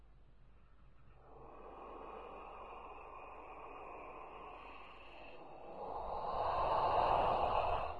scary moan2
ghost is moaning
Recorded with AV Voice Changer Software